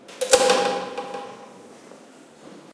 the characteristic noise made closing an iron gate (Spanish 'cancela') of the kind commonly found in old, traditional houses in Seville. Usually this gate leads to a small courtyard under cover. Sony PCM M10 internal mics
city, seville, door, gate, spain, field-recording